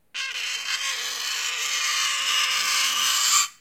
Fork scraping metal sound, like nails scraping sound, recorded with tascamDR07